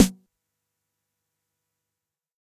Snares from a Jomox Xbase09 recorded with a Millenia STT1